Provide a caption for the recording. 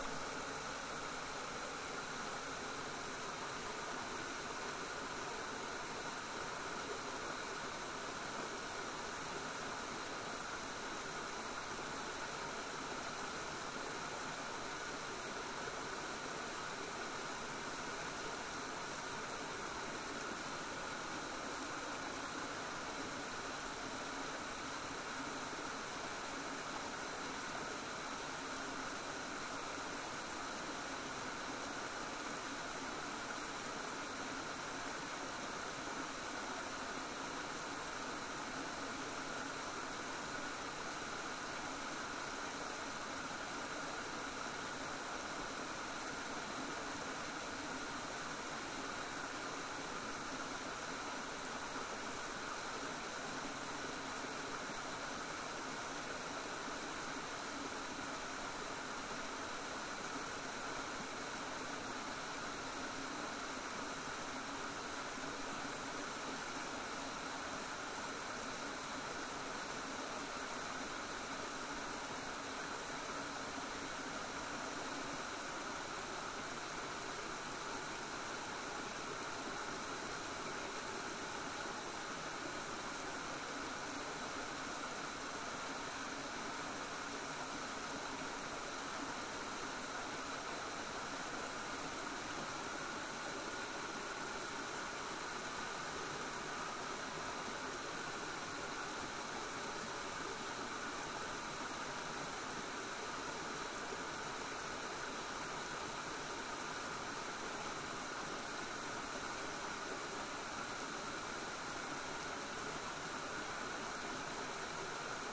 Forest waterfall. This sample has been edited to reduce or eliminate all other sounds than what the sample name suggests.
forest, field-recording, waterfall